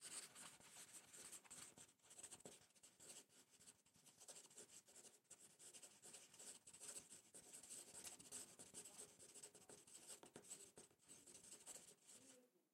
writing with marker on paper
writing with a marker on a paper
drawing, marker, paper, words, write, writing